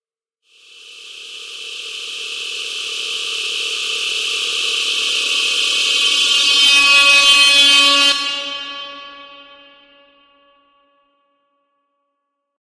Glitch Riser
Riser made using the free digital synth Helm
Glitch, riser, rising, sampling, screech, synth, synthesizer